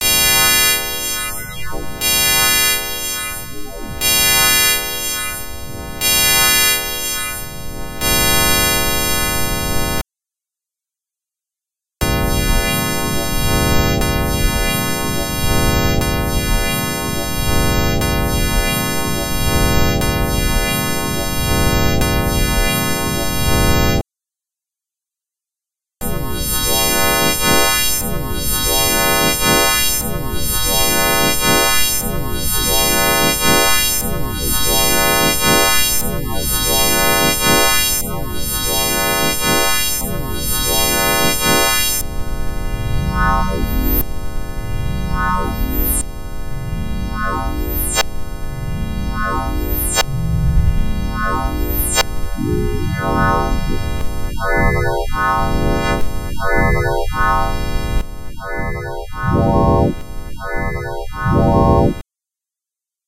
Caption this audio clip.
Weird Noisesw
a, g, t